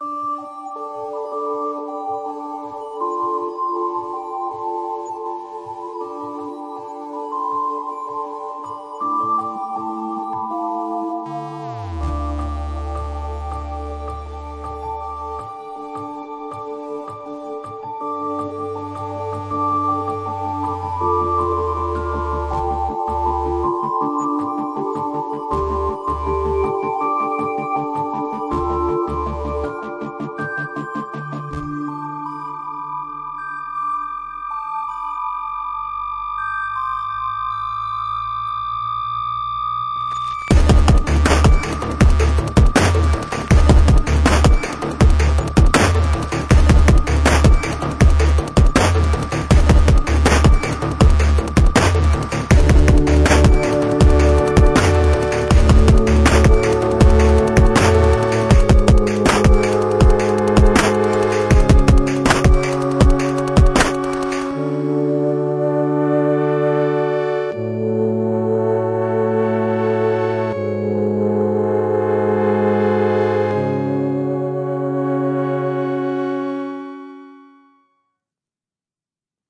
Bit Forest end music
End theme music of for a concept track called bit forest
metal, forest, sinister, scary, bit, evil, chip-tune, music, dark, guitar, drums